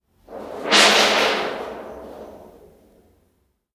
foley for my final assignment, classic metal sheet being shaken
electricity, foley